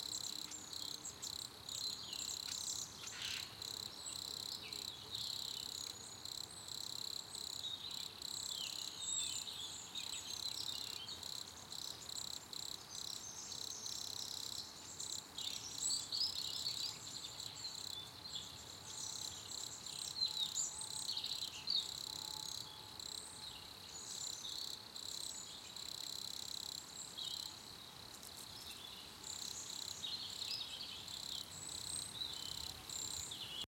Cricket Bird 2A
Microphone: Rode NT4 (Stereo)